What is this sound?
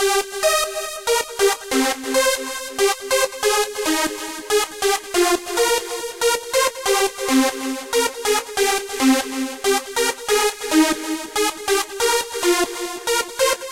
A sequence created with f.l. studio 6 the synth has a delay effect on it.
140-bpm, bass, beat, distortion, flange, hard, melody, pad, progression, sequence, strings, synth, techno, trance